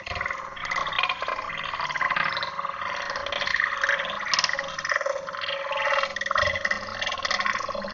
For this sound I mixed two sounds and I lowered the speed and I did inversion. After that I increased the height.This sound makes me remember to the sound of the forest.
height, mix, sinusoid, inversion, speed